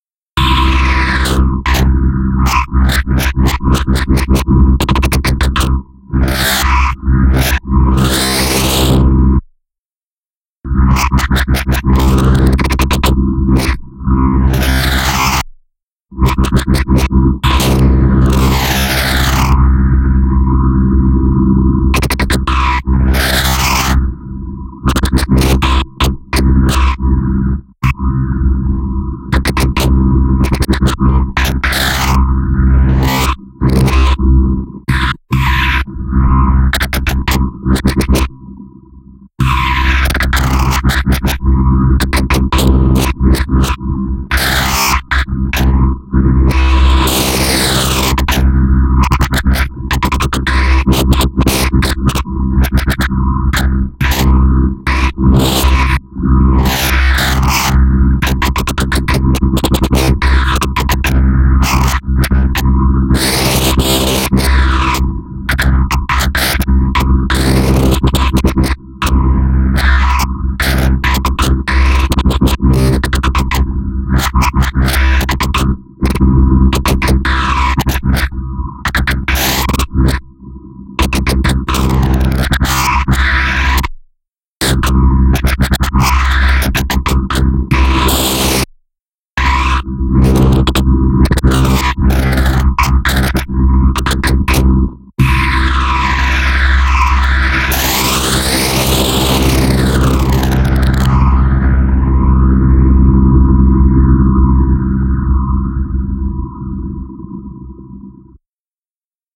Another recording transformed in Audacity and played around with in Harmor. Sounds like a wild alien animal or a monster.